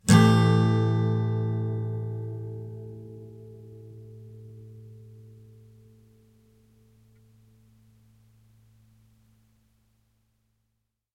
yamaha Am7
Yamaha acoustic guitar strum with medium metal pick. Barely processed in Cool Edit 96. First batch of A chords. Filename indicates chord.
acoustic, am7, free, guitar, minor, sample, sound, yamaha